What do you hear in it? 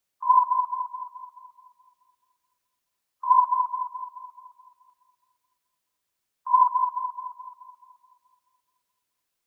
Submarine Sonar
Sonar ping of a submarine.
military, ping, radar, scan, sea, sonar, submarine, underwater, vessel, water